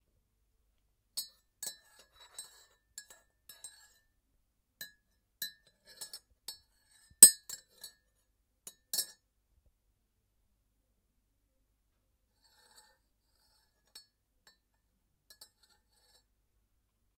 A teaspoon tapping and stirring a china mug
A simple teaspoon and mug sound. Recorded with Zoom H6n and Rhode NTG2
stir, foley, coffee, spoon, mug